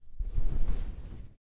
43.Telon Abriendose
cloth, slide